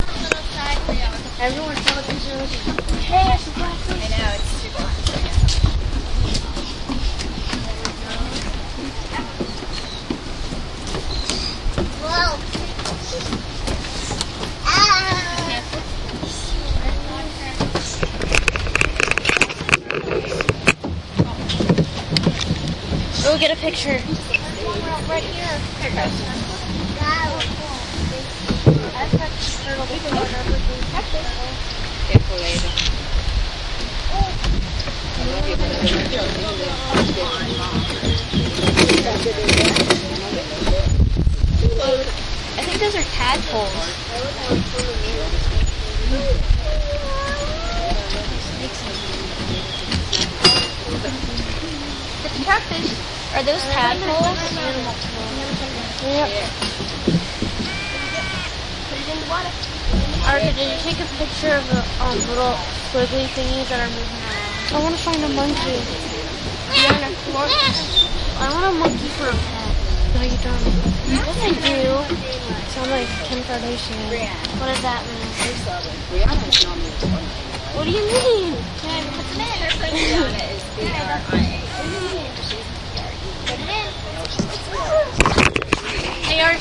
Walking through the trees recorded at Busch Wildlife Sanctuary with Olympus DS-40.